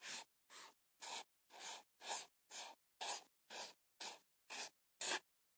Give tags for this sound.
pencil; pen; marker; drawing

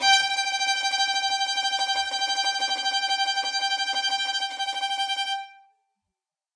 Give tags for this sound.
multisample; strings; tremolo; violin

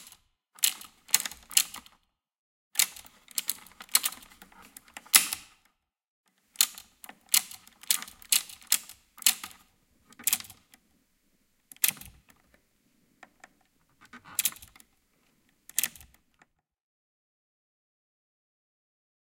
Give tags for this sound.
Factory; Handle

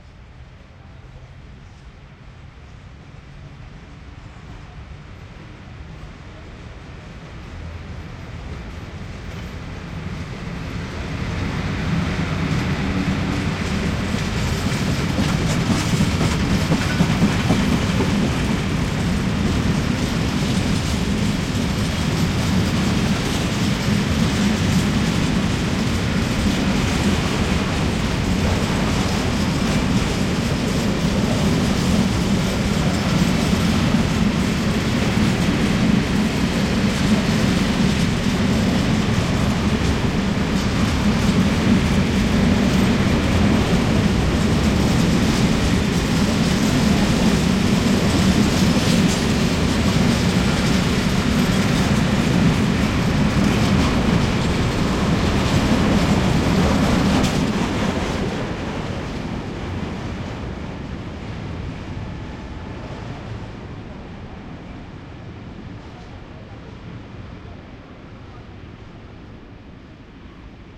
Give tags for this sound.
cargo; rail; rijeka; station; train

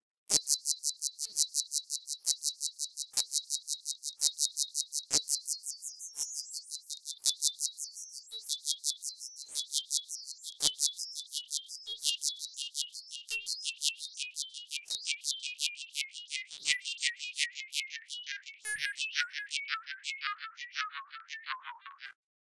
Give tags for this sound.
weird; ship; computer; aliens; laser; space

